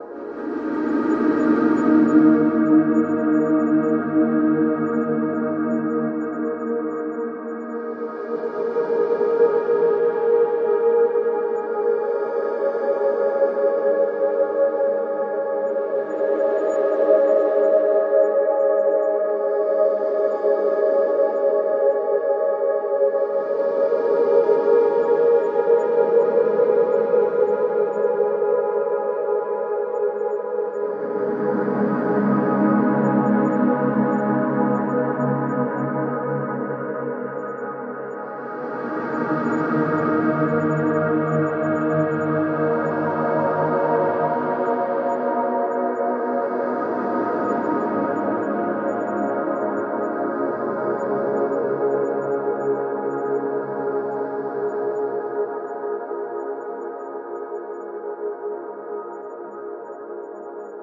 Ambient Wave 19
This sound or sounds was created through the help of VST's, time shifting, parametric EQ, cutting, sampling, layering and many other methods of sound manipulation.
Any amount donated is greatly appreciated and words can't show how much I appreciate you. Thank you for reading.
๐Ÿ…ต๐Ÿ† ๐Ÿ…ด๐Ÿ…ด๐Ÿ†‚๐Ÿ…พ๐Ÿ†„๐Ÿ…ฝ๐Ÿ…ณ.๐Ÿ…พ๐Ÿ† ๐Ÿ…ถ
Ambiance, Ambience, Ambient, atmosphere, Cinematic, commercial, Drums, Loop, Looping, Piano, Sound-Design